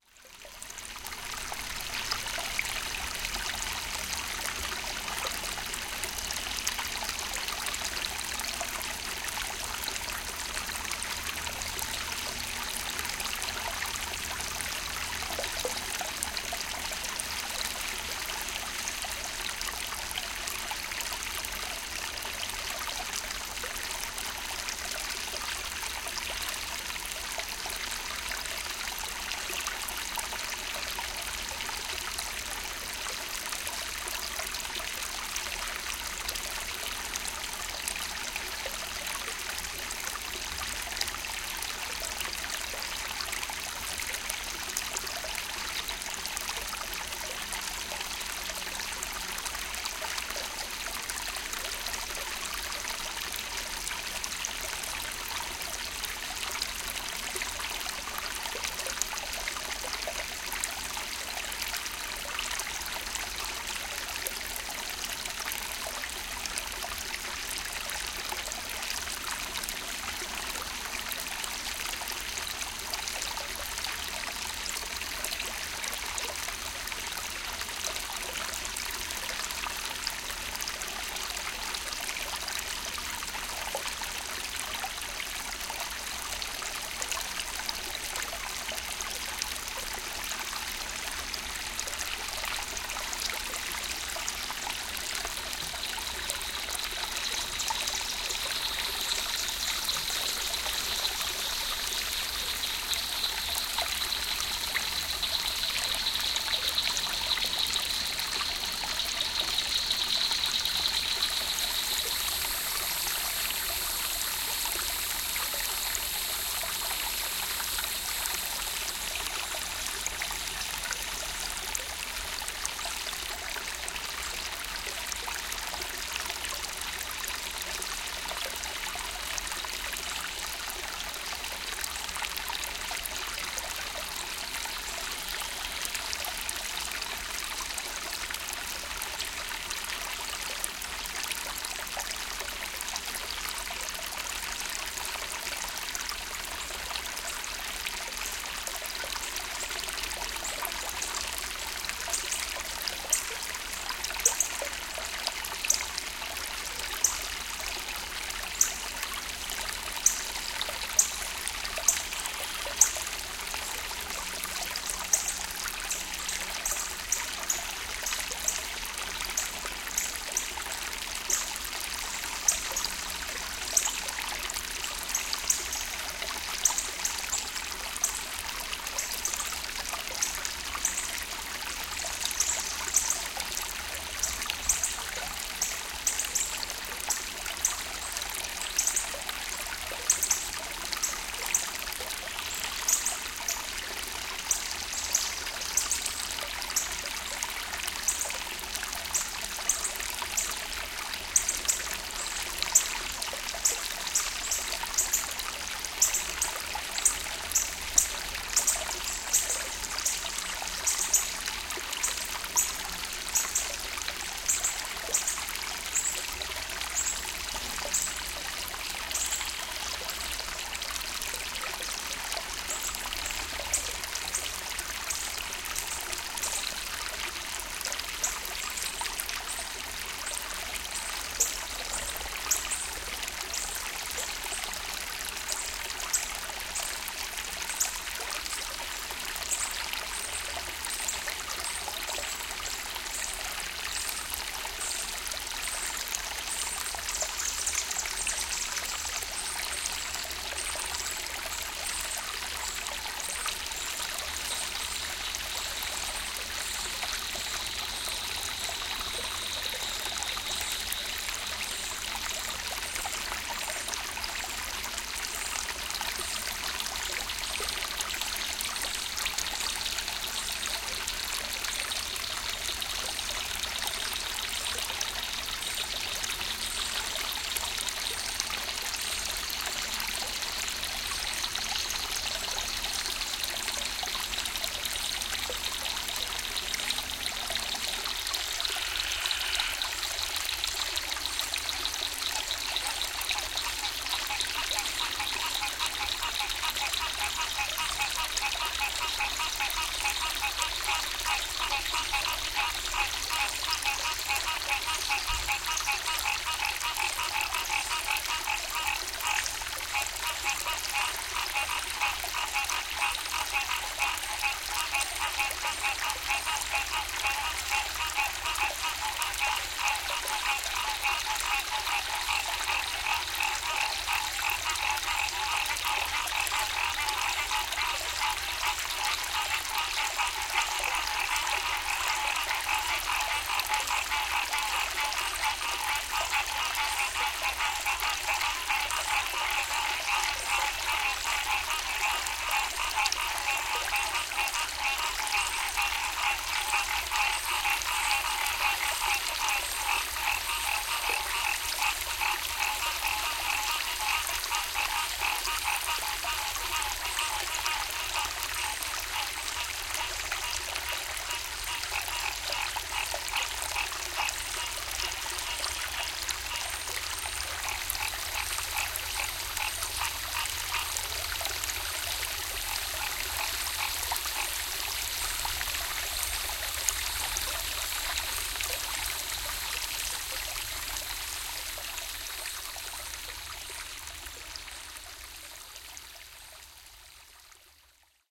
Creek-BoykinSprings-Mst1-1644
Recorded on June 11, 2010 at Boykin Springs park in East Texas at dusk. Used 2 NTA1 mics with a Marantz PMD661 recorder. 120 degree mic spacing, 20 centimeters apart, about 18 inches off ground in the middle of a 10-15 foot wide creek. The water you hear was maybe 2 inches deep at most, flowing over a grooved-out sandstone creek-bed. Thick foliage on both banks, to my left and right, consisting mostly of honeysuckle. Towering, 100+ foot pine trees overhead. Plenty of mosquitoes. Not a stitch of a breeze. About 95 degrees in the shade at sunset with a heat index of 105, roughly 80-90% humidity. Tried to set up an equilateral triangle between mics and creek-bed to prevent any angular/geometric distortion in stereo image during playback (in other words, what you hear at 45 degrees to your right/left with only your ears also shows up at 45 degrees to the right/left in the stereo image of your finished recording, as opposed to ending up too close to the center or too far to the outside).
creek, water, birds, boykin-springs, cicadas, stream, running-water, sounds-of-summer, crickets, summer, frogs, summer-evening-sounds